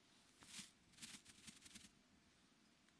small bird 3
attempt to make the sound of a small bird cleaning itself on a branch, short burst
little, rustle, washing